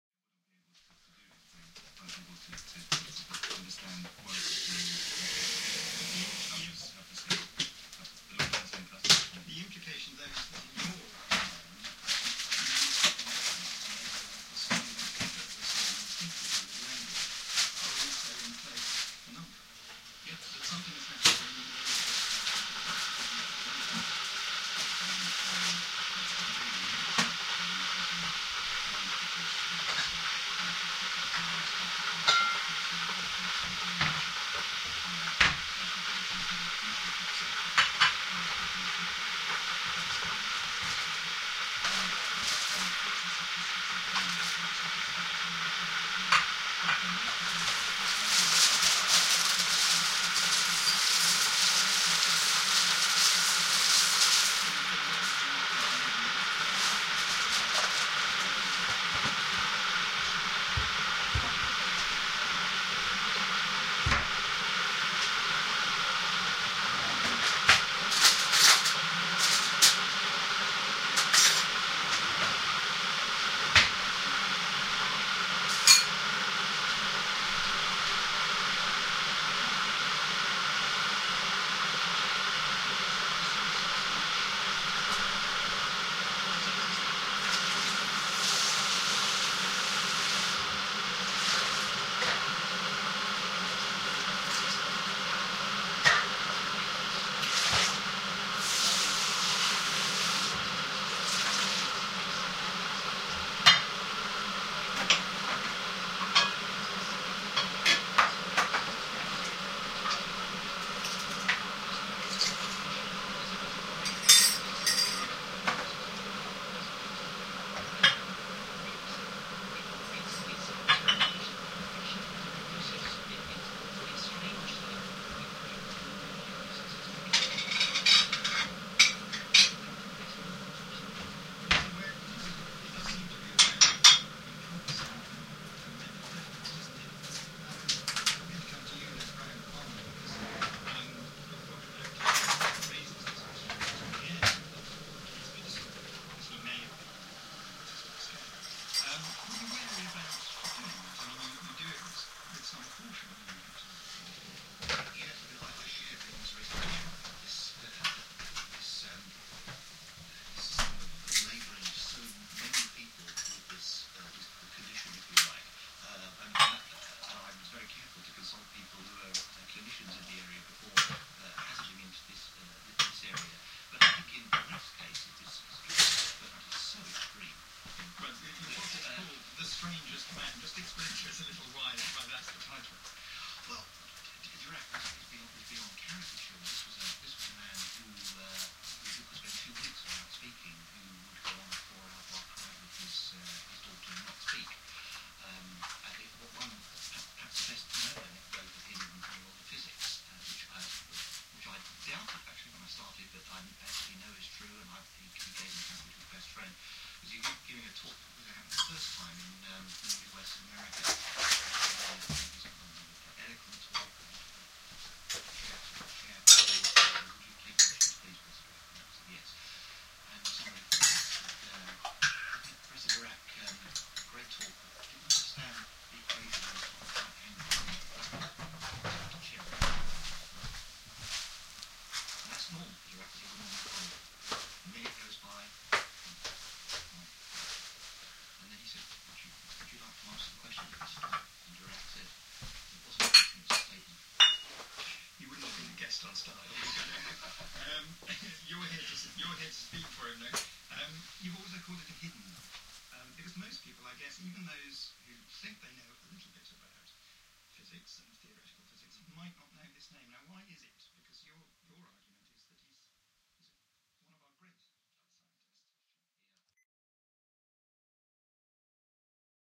This recording is of myself making breakfast in my kitchen. You can hear a full range of activity from boiling a kettle to the 'pop' of a toaster and in the background BBC radio 4.
Recorded using a Sharp MD MT80HS and a home made stereo imaging mic.
Yum, yum. You can smell the toast!